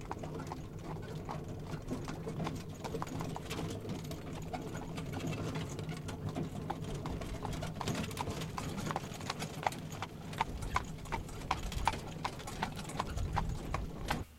Horse-drawn carriage - On board
A small journey on a horse drawn carriage.
Exterior recording - Mono